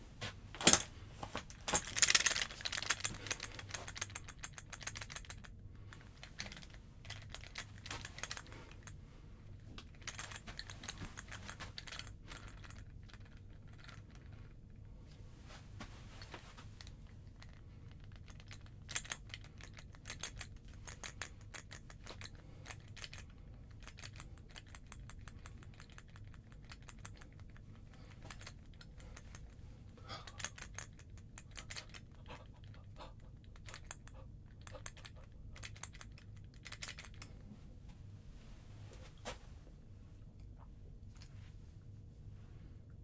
Shaking Gun
Shaking, Shakes, Gun, Shooting